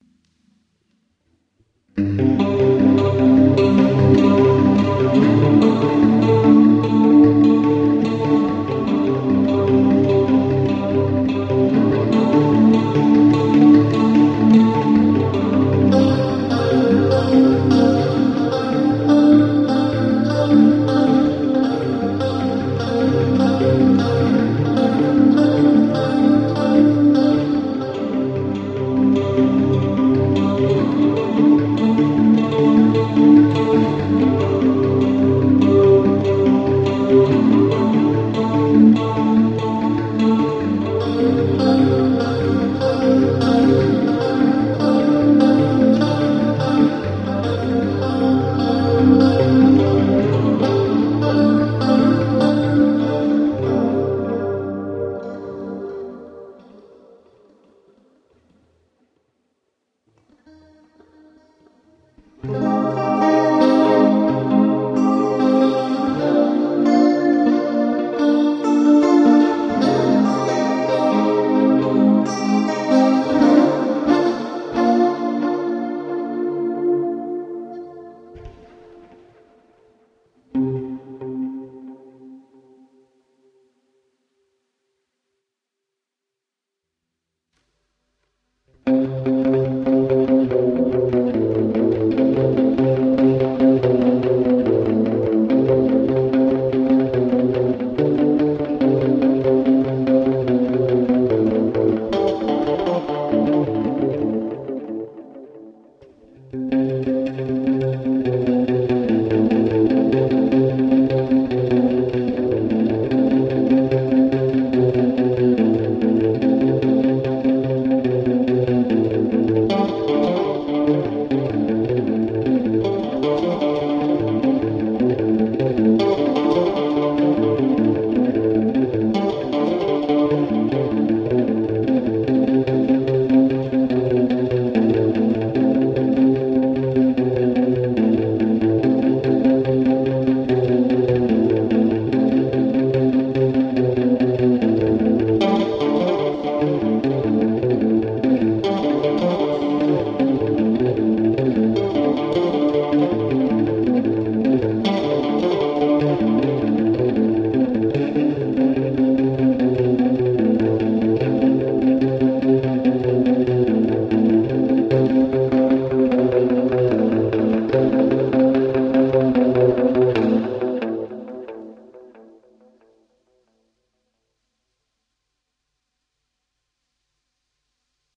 Post-punk guitar sound. Made with GuitarRig.